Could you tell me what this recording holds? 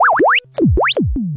sonokids-omni 07
sonokids-omni, soundesign, lol, game, sound-effect, moog, fx, synth, funny, ridicule, synthesizer, electro, comedy, analog, analogue, toy, beep, bleep, fun, abstract, bubble, filter, electronic, cartoon, happy-new-ears